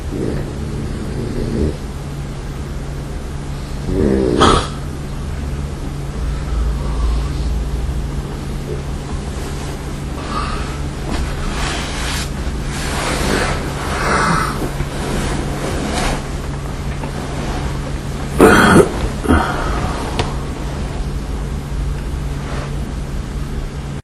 Moving while I sleep. I didn't switch off my Olympus WS-100 so it was recorded.
household, breath, bed, field-recording, human, noise, body, lofi, nature